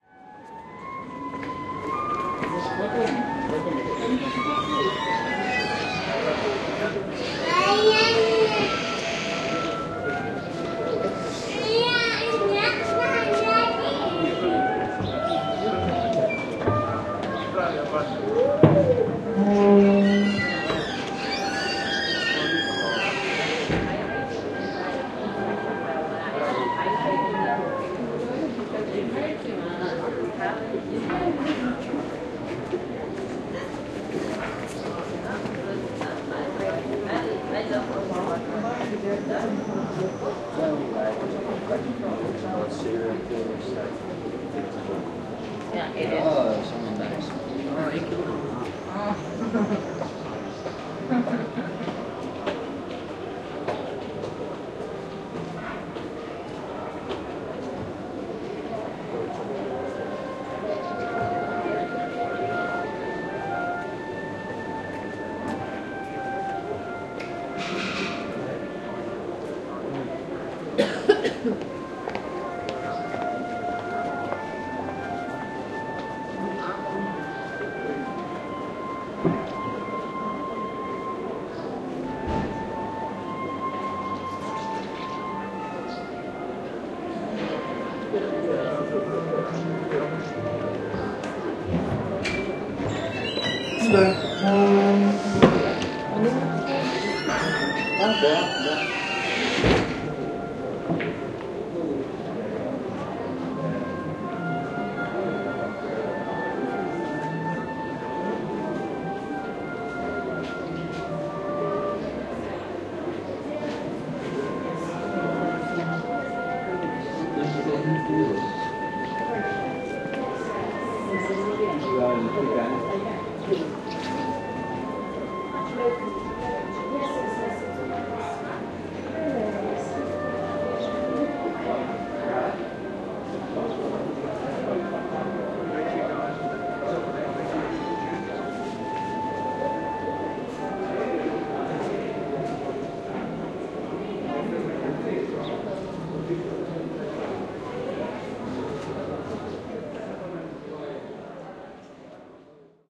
in front of pharmacy in Kotor 08.05.16
08.05.2016: one of narrow streets of Kotor in Montenegro. Ambience of 1 street (Istoc-Zapad) in front of the pharmacy. The jack russel terrier perspective. Recorder marantz pmd661 mkii + shure vp88 (no processing).
ambience, atmosphere, field-recording, Kotor, Montenegro, music, soundscape, street